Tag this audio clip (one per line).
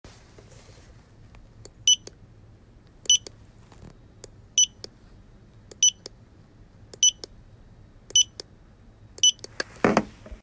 item
scanner